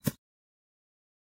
grass footstep hard 2
Footstep on grass recorded with Zoom Recorder